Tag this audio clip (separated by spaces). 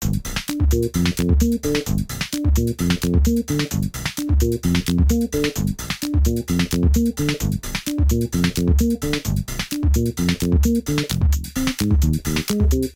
audio-library background-music download-background-music download-free-music download-music electronic-music free-music free-music-download free-music-to-use free-vlogging-music loops music music-for-videos music-for-vlog music-loops prism sbt syntheticbiocybertechnology vlog vlogger-music vlogging-music vlog-music